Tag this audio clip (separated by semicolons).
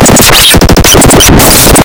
brutal; drum; dubnoise; glitch; loud; noise